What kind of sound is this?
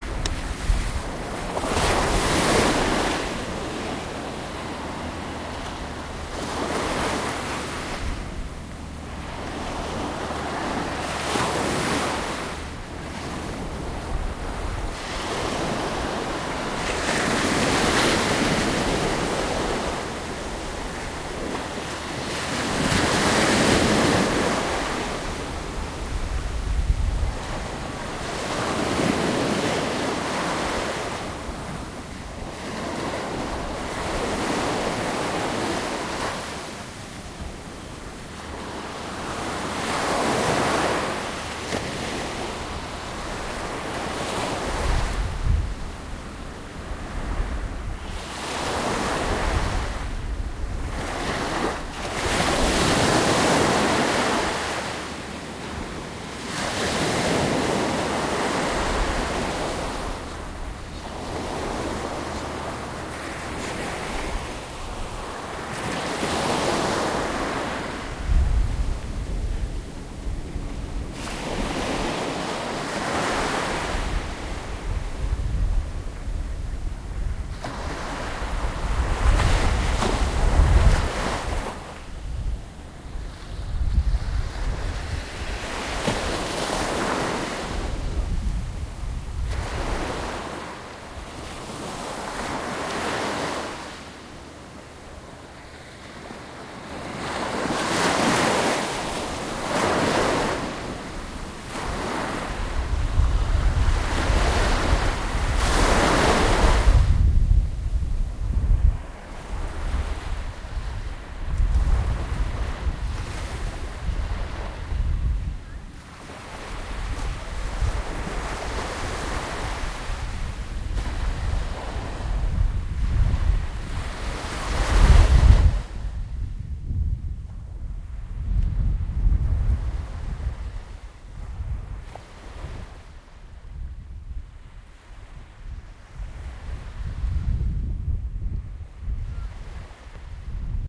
sea wave 3
ocean, seaside, wave, shore, coast